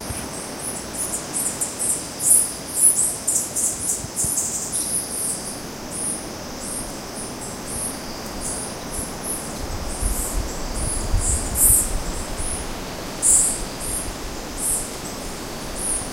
Costa Rica 5 More Birds Insects
jungle, summer, ambiance, central-america, costa-rica, birds